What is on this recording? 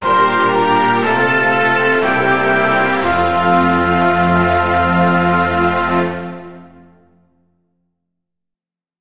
Success Triumph Resolution Sound Effect
Uses brass section, flute, oboe, cello section, violin section and cymbals. Thank you and enjoy.
game, positive, relax, resolution, victory